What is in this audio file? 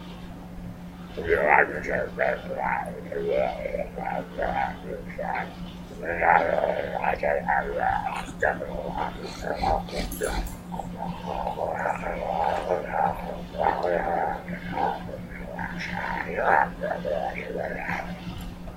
I altered my voice to create this giant talking for my audio drama